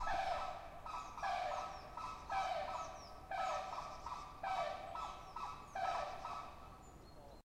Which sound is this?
You can hear a flamingo bird.